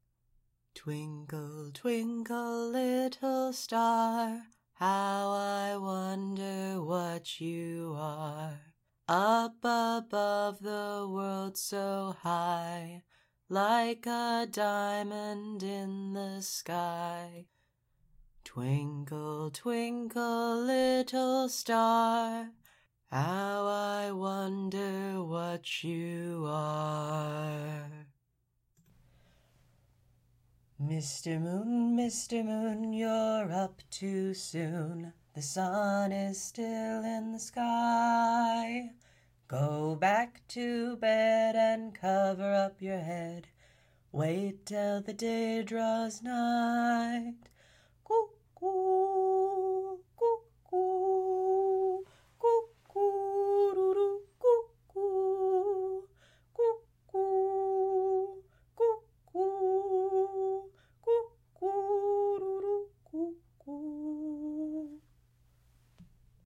singing twinkle twinkle, Mr. moon
A female voice singing twinkle twinkle little star and Mr. Moon
voice, twinkle-twinkle-little-star, female, nursery-rhyme, singing, Mr-Moon